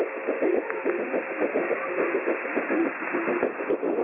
scratchy (turntable-like)
Picked up on Twente University's online radio receiver. Some weird scratching, sounds a bit like a turntable.